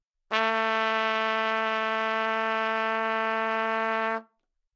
overall quality of single note - trumpet - A3
Part of the Good-sounds dataset of monophonic instrumental sounds.
instrument::trumpet
note::A
octave::3
midi note::45
tuning reference::440
good-sounds-id::1045
dynamic_level::mf